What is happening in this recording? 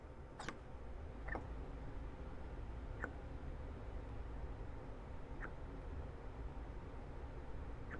Detergent being squeezed out of bottle, sound of air sucking in